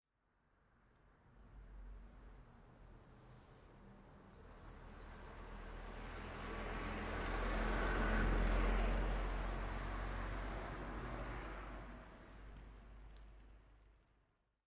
Old Truck Pass By